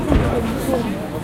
p1 27 dreun babbel
Sound belongs to a sample pack of several human produced sounds that I mixed into a "song".